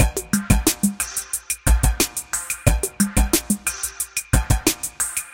A drumloop from the past...in your present time !
With Chorus feedback !
Beatbox, CR, Drumloop, Minimalist